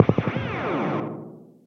Alien Weapon 017 echo
Sounds like an alien weapon, laser beam, etc.
Processed from some old experiments of mine involving the guiar amp modelling software Revalver III. These add some echo added for extra cheezy sci-fi effect.
Maybe they could be useful as game FX.
See pack description for more details.
amp-VST, Revalver-III, beam, FX, alien, weapon, amplifier, game, experimental, amp-modelling, sci-fi, laser, arifact, virtual-amp